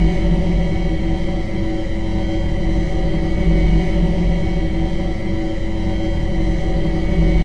metasynth meat slicer
Metasynth again. This was once a cat's meow, and now sounds more...dark ambient tonal machine noisy. Loop might need some adjusting. doesn't play as smoothly on quicktime as it did when i made it. ~leaf
dark, electric, horror, industrial, loop, machine, metasynth, noise, pad, synthesized